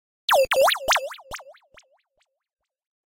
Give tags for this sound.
computer robotic lo-fi fx sound-effect freaky digital

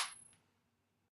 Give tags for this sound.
nail hi cling

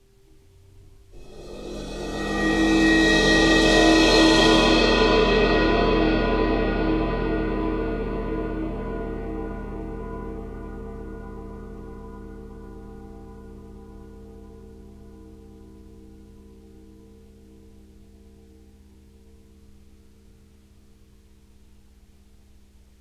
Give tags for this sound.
ambiance ambient atmosphere bowed-cymbal overtones soundscape

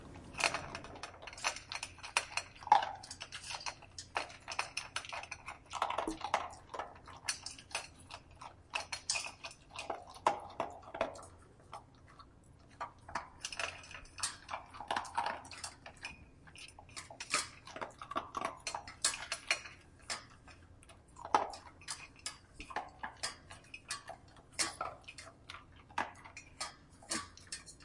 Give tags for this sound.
chew crunch crunchy metal dog chewing munch

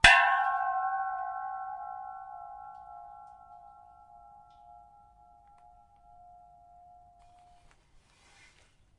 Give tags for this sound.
cylinder deceleration drumstick gas harmonics percussion resonance

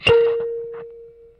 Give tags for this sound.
amp bleep blip bloop electric kalimba thumb-piano tines tone

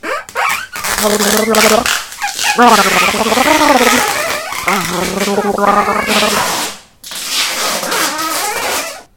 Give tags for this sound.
bathtub phantom cellophane

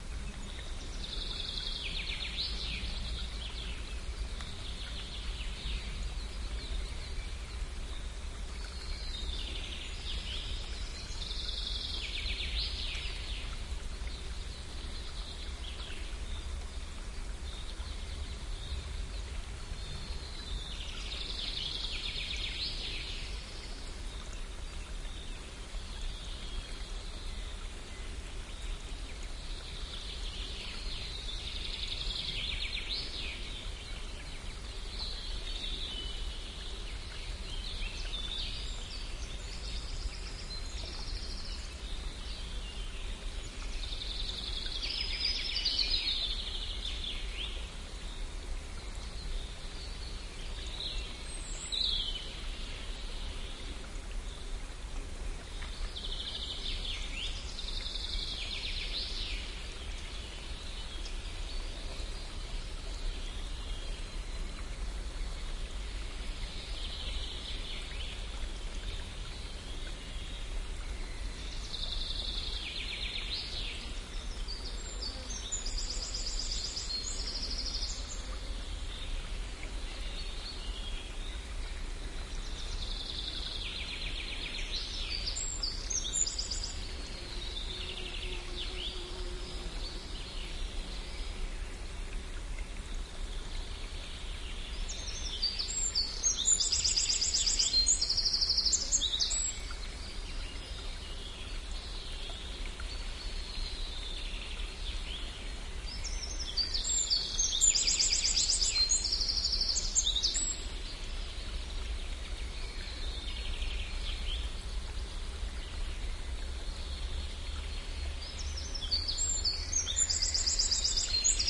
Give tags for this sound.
field-recording mountainstream binaural

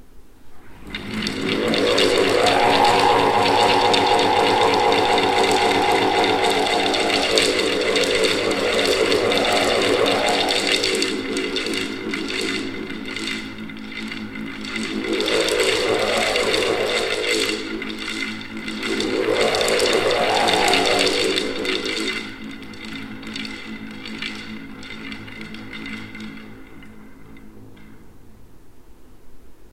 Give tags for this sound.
air rotate rubber

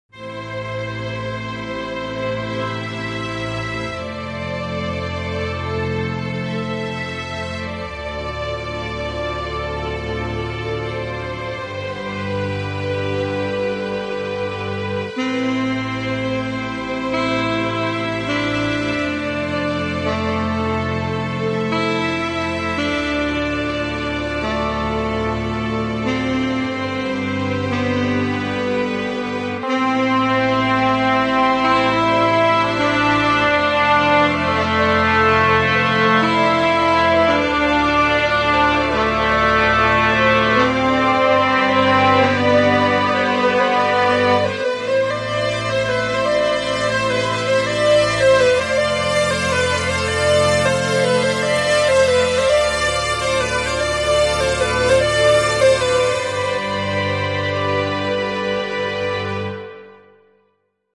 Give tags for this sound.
orchestral trumphet cello cinematic

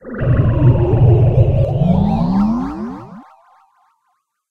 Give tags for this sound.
50s 50s-flying-saucer Space Spaceship UFO

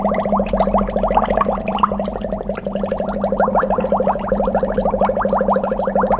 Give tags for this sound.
bubbles house water

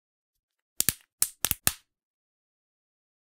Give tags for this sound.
crunch break fingers

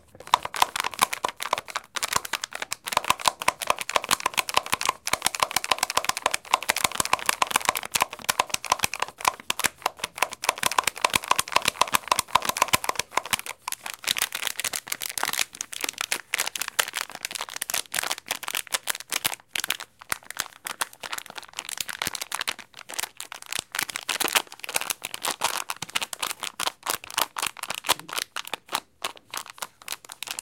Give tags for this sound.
cup France IDES Paris plastic school

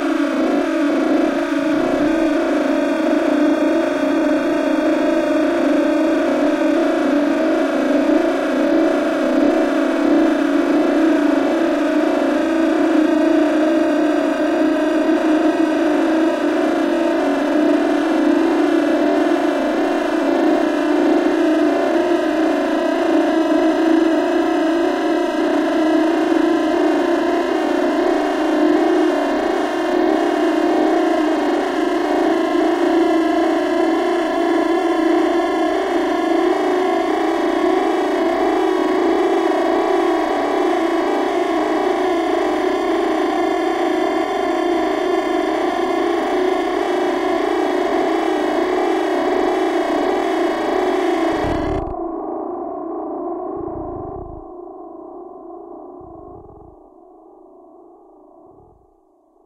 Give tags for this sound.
distorted mangled repetitious

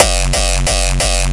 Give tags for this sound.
180 180bpm bass beat dirty distorted distortion gabber hard hardcore kick kick-drum kickdrum single-hit

